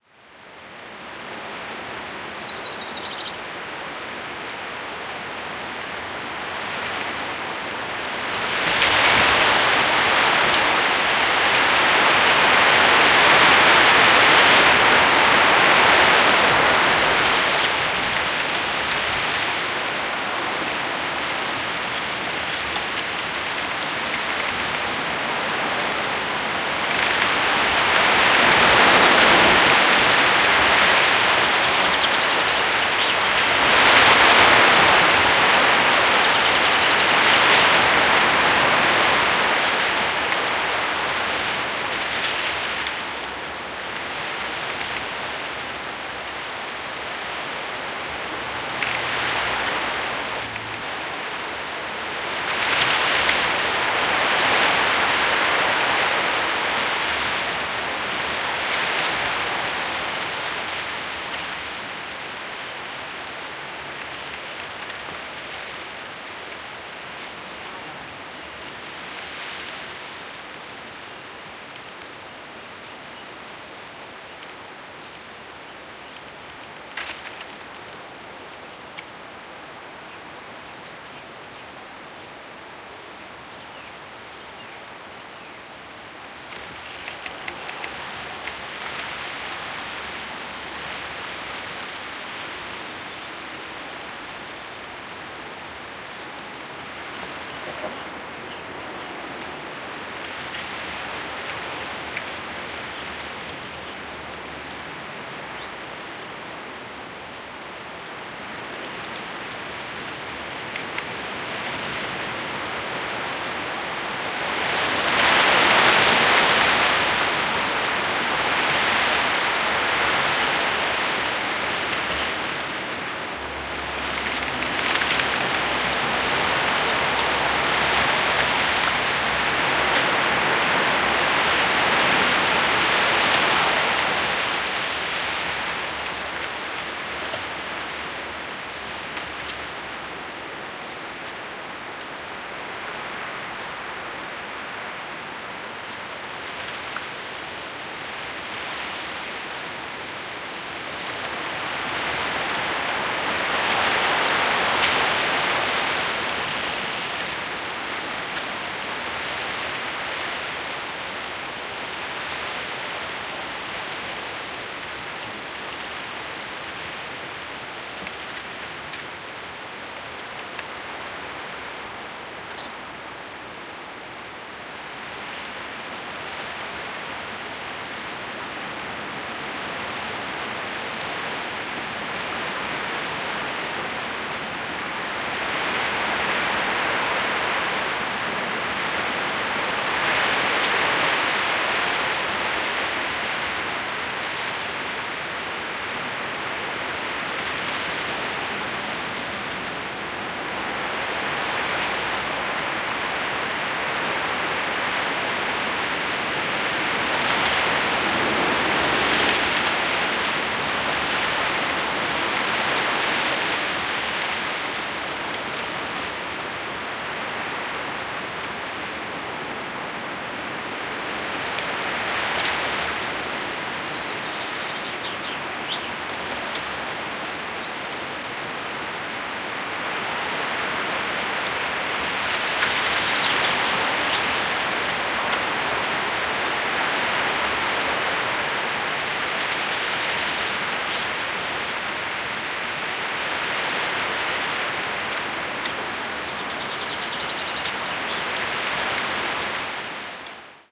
Wind In Bamboo 2
A stereo field-recording of a gusty wind blowing through a bamboo thicket. As well as leaf noises the bamboo stems strike one another intermittently. Sony ECM-MS907 & Deadcat > FEL battery pre-amp > Zoom H2 line in.
stereo, clack, leaves, rustling, bamboo, wind, field-recording, ms